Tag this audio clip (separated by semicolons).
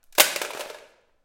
crashing; speaker; object; falling; floor; computer